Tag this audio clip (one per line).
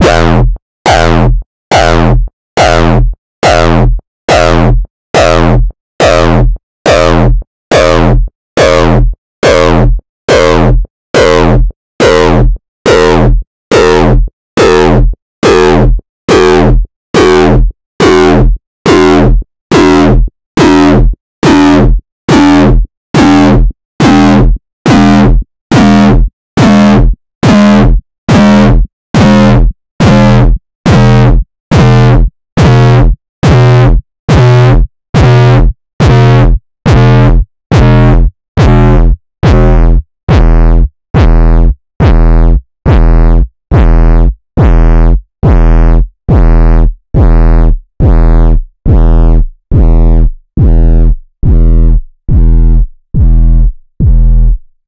aftershock
dark
distorted
hard
kick
synthesized